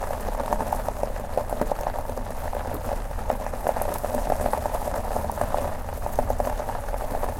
gravel road
A midsize SUV (Honda CR-V) driving on a gravel driveway. Loops seamlessly. Recorded with a Roland Edirol R-09HR and edited in Adobe Audition.
pebbles, car, stones, gravel, truck, driving, automobile, driveway, road, vehicle, drive